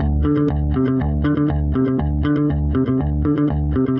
02 Disco bass loop 120 bpm
Thank you for listening and I hope you will use the bass loop well :-)
120, 120bmp, bass, bmp, disco